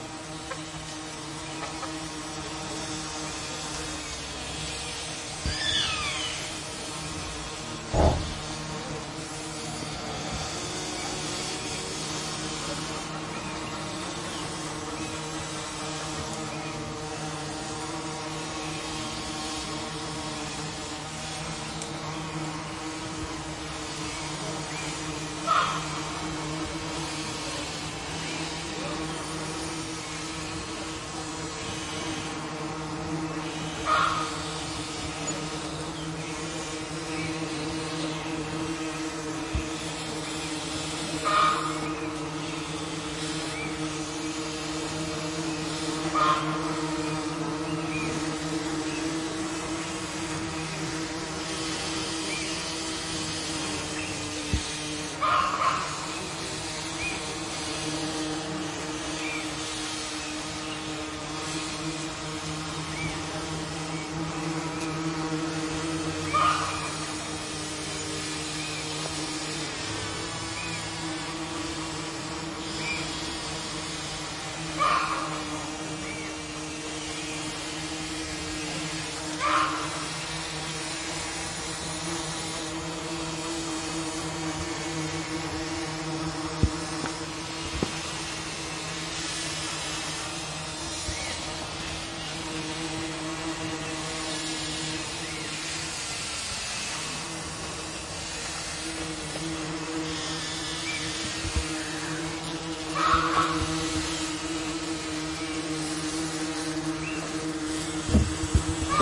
Tried to make clean recording of a small tree covered in flowers feeding a bunch of bees but the other farm and rural animals got in it too. Namely a goose, an eagle, hens and some birds. Recorded near Llaullao in the afternoon.
Rec'd on a MixPre6 with LOM Usi Pro microphones.